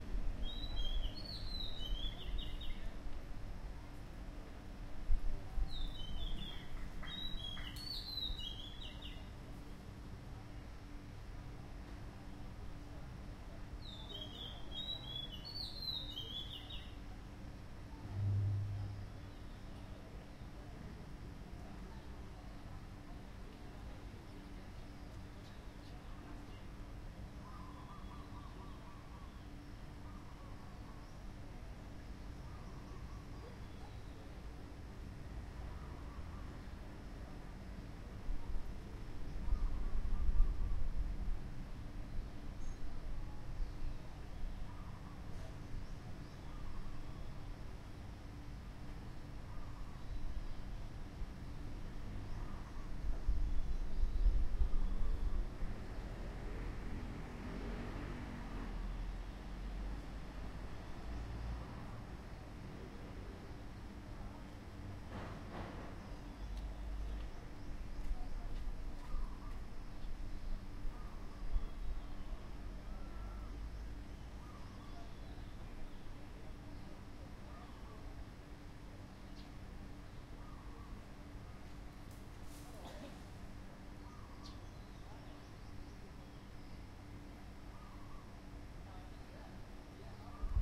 Everything from birds, to people talking in the distance, to a motorcycle driving by.